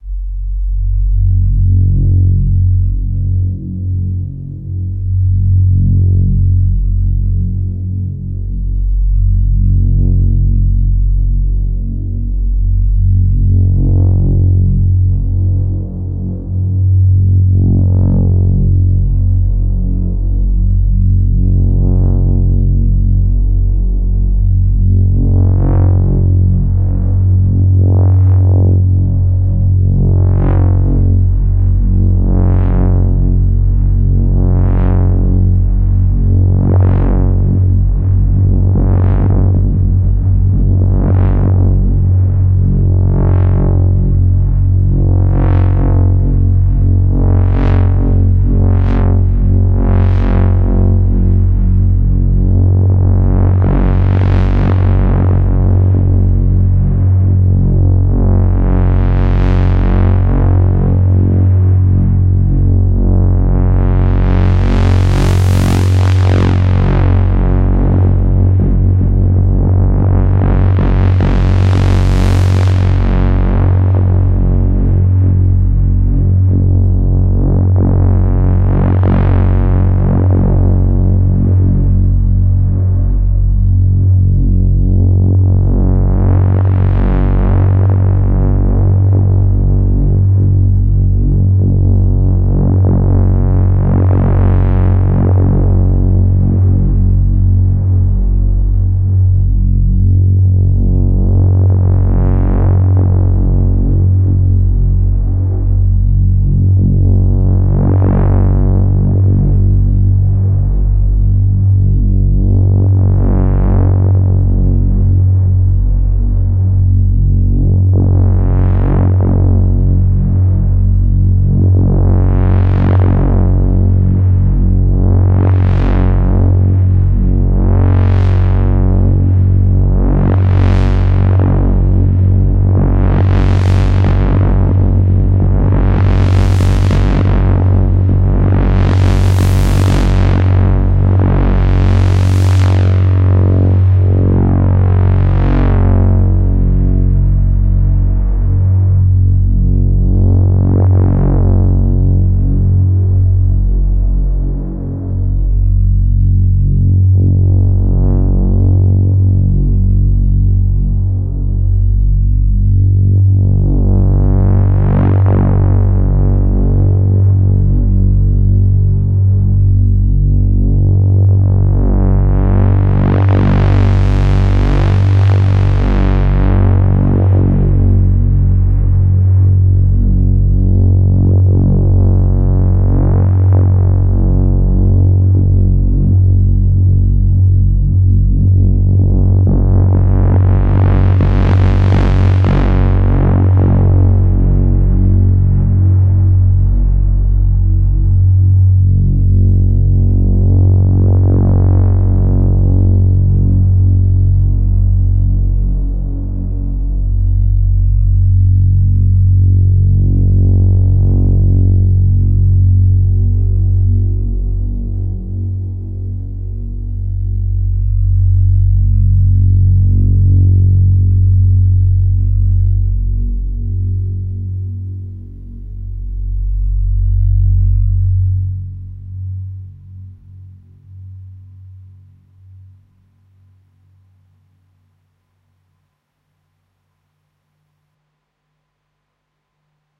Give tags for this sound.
Machinery
Low
drone
analog
Machine
Factory
Ambience
Hum
Mechanical
Industrial
Artificial
LFO
Buzz
modeld
Noise

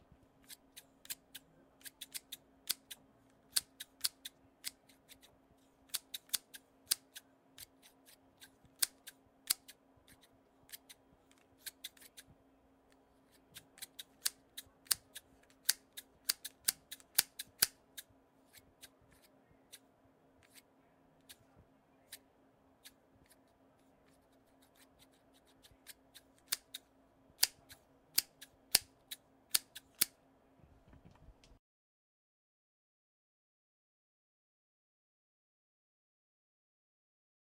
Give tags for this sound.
cut
scissors
slice

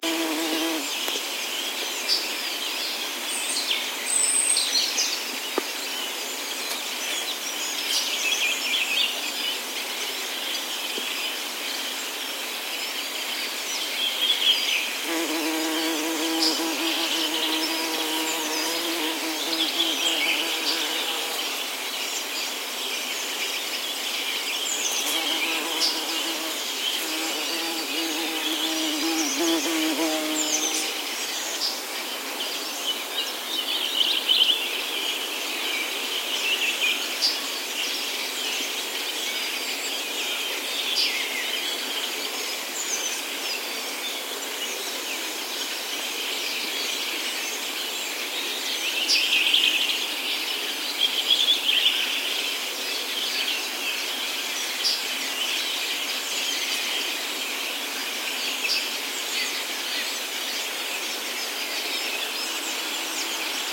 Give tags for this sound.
ambience
buzzing
field-recording
ambient
forest
bumblebee
spring

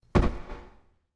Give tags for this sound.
stomp
boot

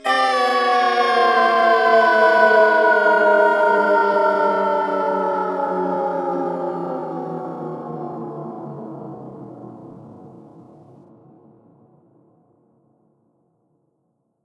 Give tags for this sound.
death
evil
girl
no
nooo
scream
shout
supernatural
yell

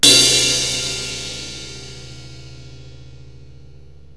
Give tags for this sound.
crash
cymbal
drums
e
funk
heavy
hit
live
metal
ride
rock
splash

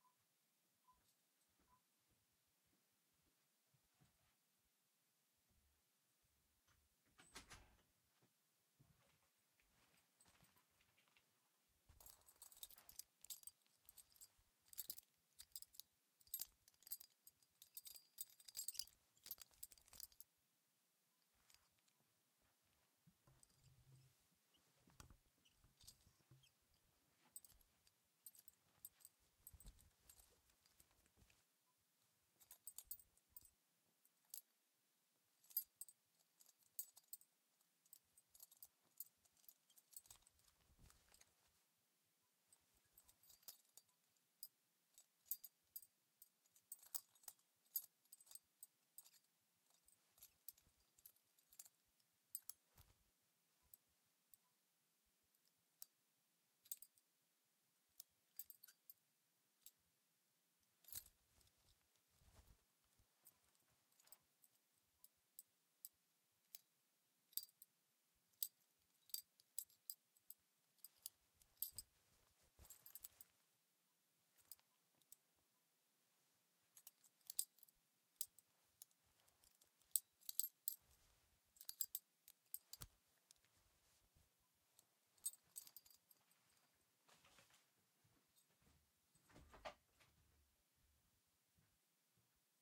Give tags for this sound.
Clean
Clothing
Foley

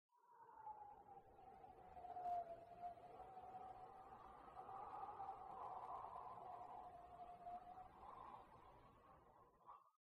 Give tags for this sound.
suave
sonido

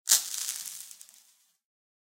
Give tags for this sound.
crumble
litter
dirt
agaxly
dust
cave
scatter
gravel

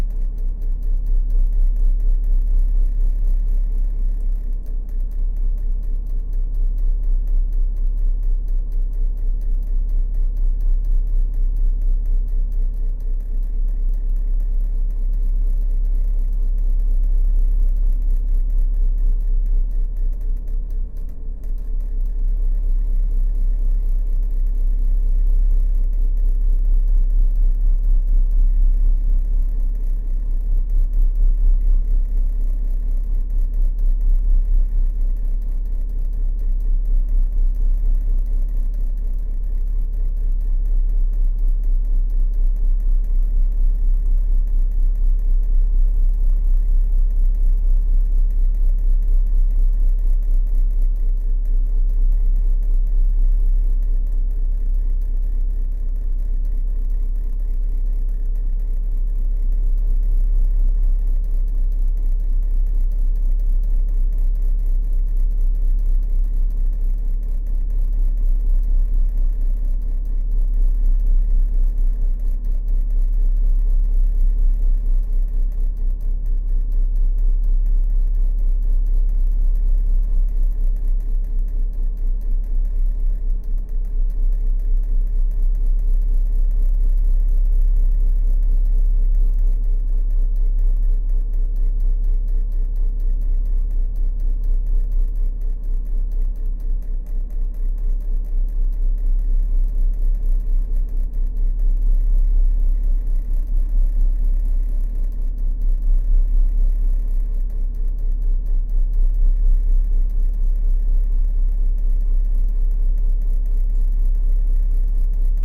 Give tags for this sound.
cabin
fieldrecording
ship
shipscabin

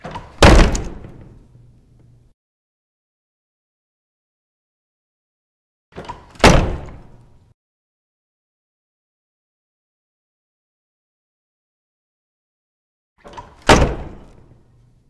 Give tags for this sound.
opening
handle
slamming
slam
closing
doors
shut
door
wooden
close
open

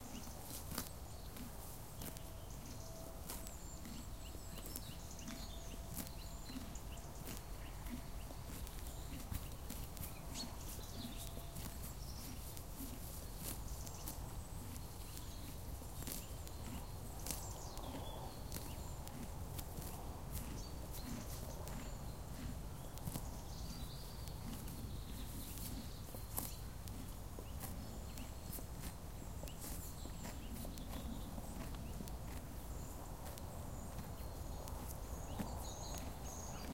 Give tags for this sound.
countryside
horse
broute
cheval
pasture
graze
meadow
grazing

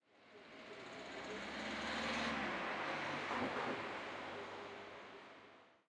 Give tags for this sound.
automobile
car
drive
driving
engine
motor
vehicle

